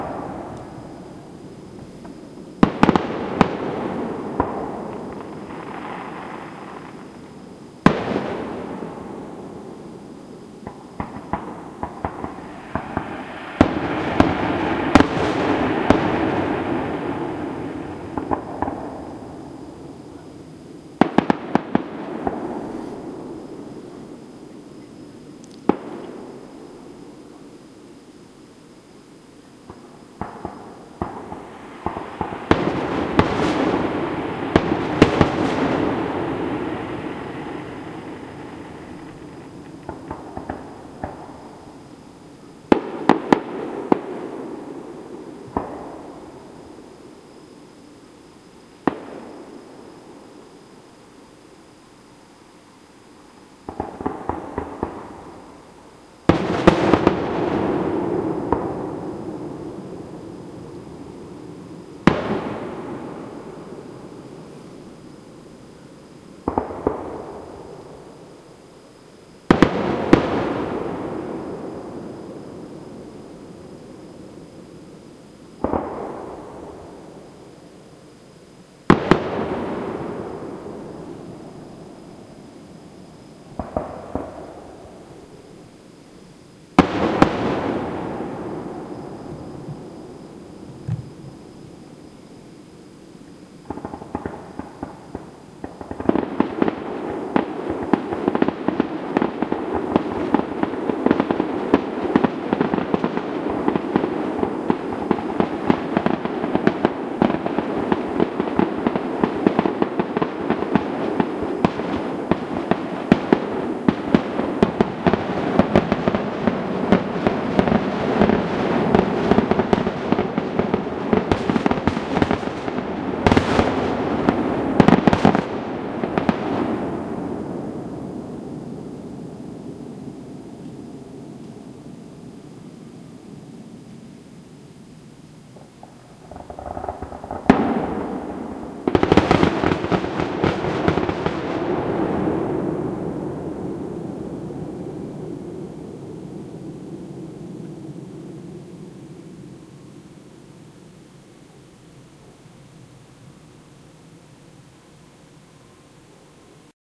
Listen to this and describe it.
fw-audio-raw
Tascam TM-2X X-Y DSLR mics recording some fireworks and finale. Decay in valley. Pretty cool,, raw, no efx.